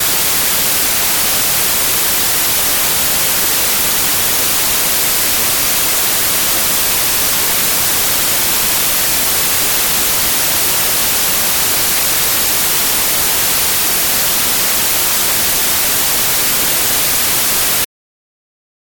white noise ruido blanco
Just a white noise loop. It is always useful.
blanco, hq, noise, rido, test, white